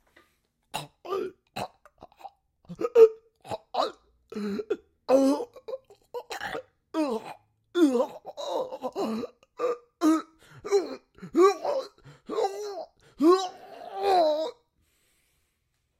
Did some coughing and choking and heavy breathing for a horror short. Good for dying and probably could be used for zombie sounds.
horror, choke, human, male, hacking, cough, guy, vocal, sickness, sick, ill, zombie, unhealthy, vocals, speech, voice, sneeze, raw, choking